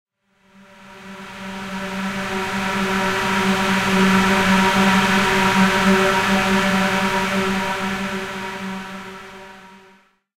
Quitting Time
Airypad sound, almost like a high powered whistle.
breath, dark, edison, pad, single-hit